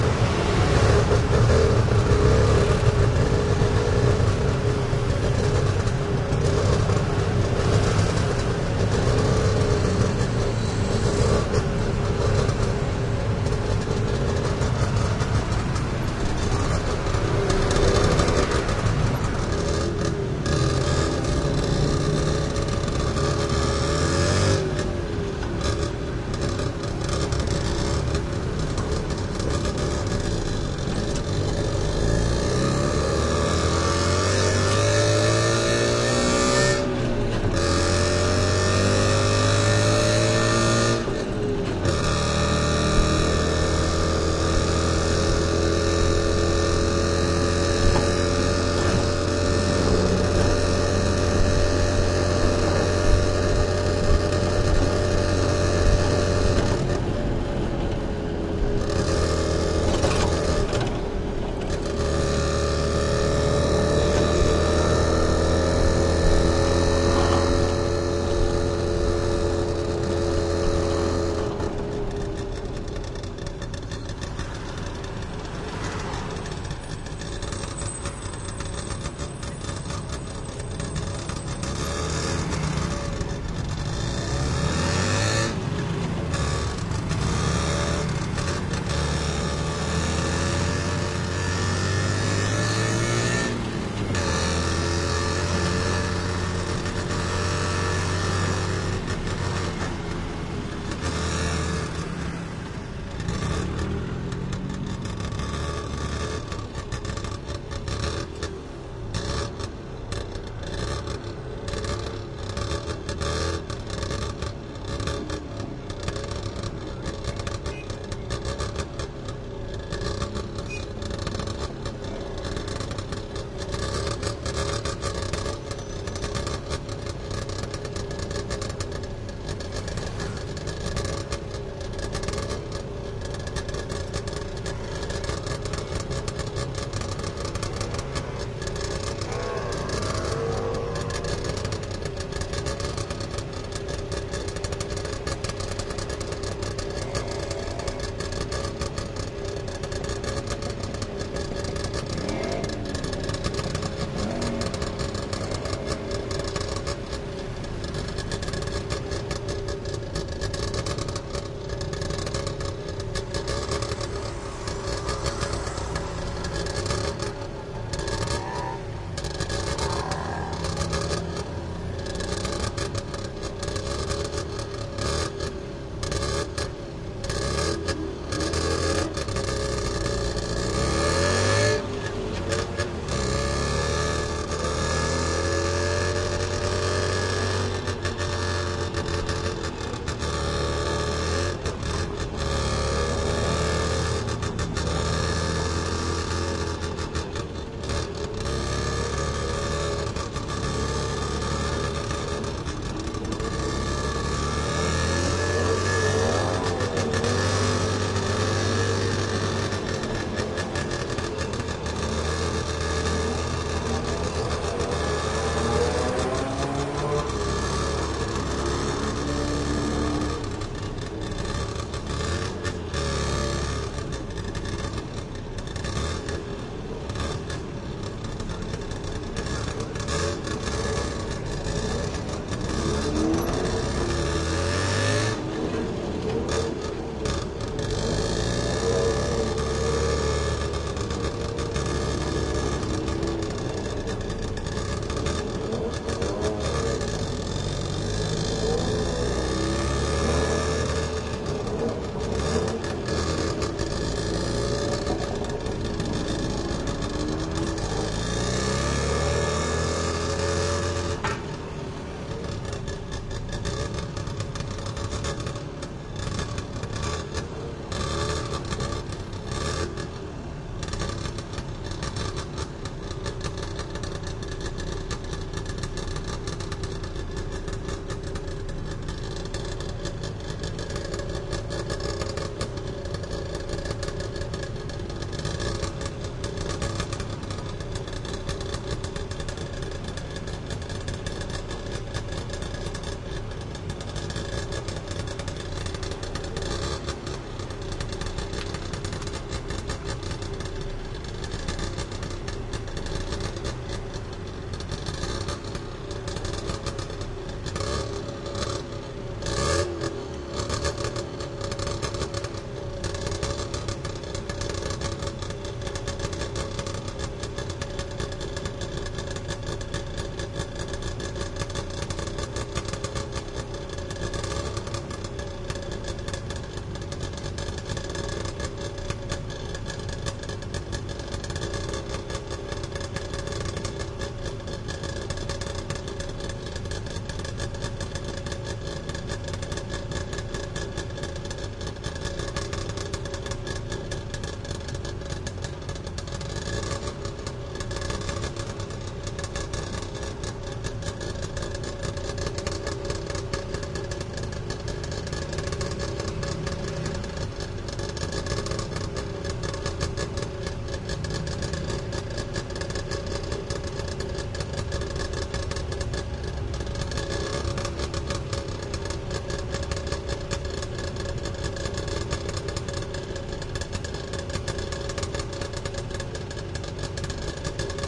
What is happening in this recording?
Thailand Bangkok tuk tuk motorcycle taxi on board stereo good spread long various high speed low speed through traffic great very sharp motor1
Thailand Bangkok tuk tuk motorcycle taxi on board stereo good spread long various high speed low speed through traffic great very sharp motor
Bangkok, board, field-recording, motorcycle, taxi, Thailand, tuk